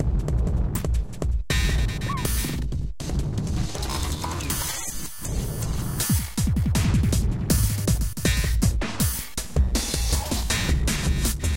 Drumloops and Noise Candy. For the Nose